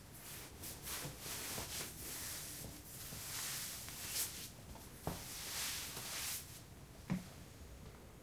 Taking-off-some-nylons
Taking off some nylons --> soft sound